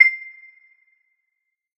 This is part of a multisampled pack.
The chimes were synthesised then sampled over 2 octaves at semitone intervals.
metallic, one-shot, synthesised